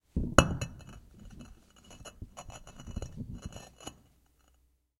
stone on stone
stone dragging on stone
grinding, concrete, stone